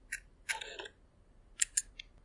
Focusing and shooting with an Fuji X100s digital mirrorless compact camera.
dslr, digital, shutter